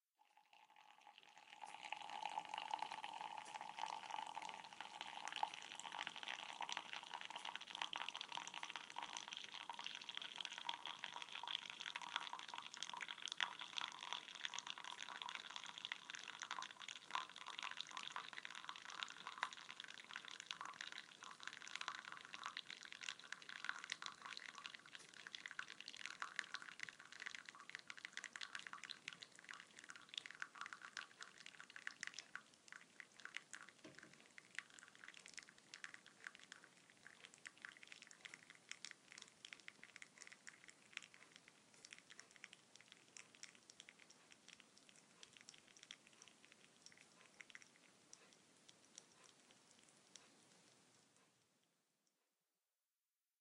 drip coffee
Coffee dripping into cup. Ambient sound: heat source cooling down and clicking occasionally, clock tick toward end.
coffee-cup, cup, drip-coffee, dripping, hot, kettle, kitchen, pouring